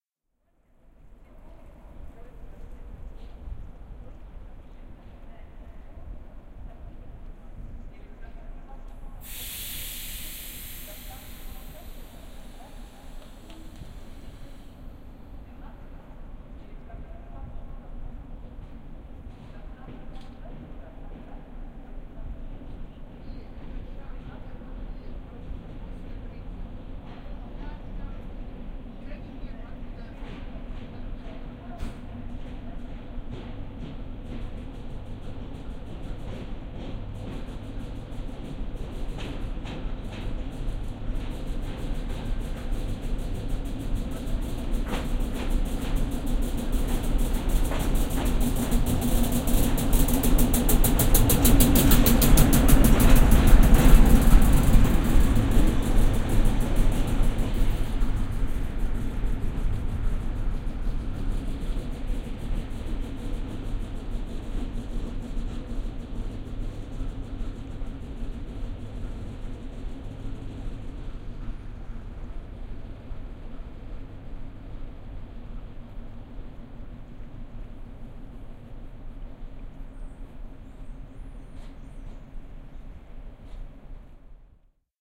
Diesel shunter passing by. Kazansky Railway Station. Moscow, 8 October 2021. Around 7.30 PM
Diesel shunter passing by. Kazansky Railway Station. Moscow, 8 October 2021
train, rail, trains, shunter, rail-road, railroad, binaural